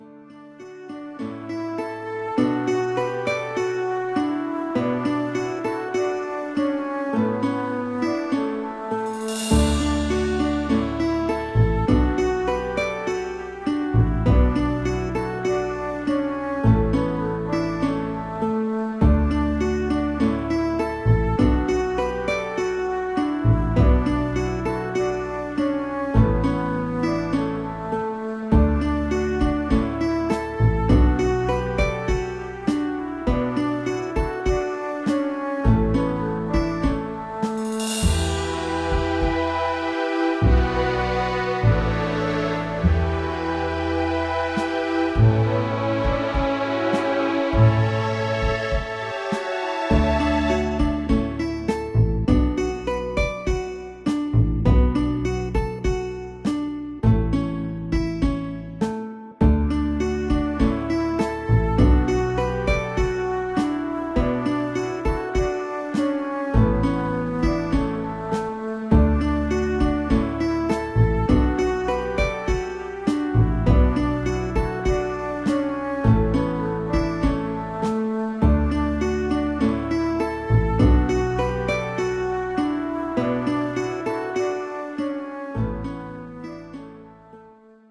It's time for an adventure
A calm melody and sound, it is supposed to create a feeling of being in fairy tale or some fantasy world. Enjoy!
Tranquility, Adventure, Medieval, Fantasy, Fairy-tale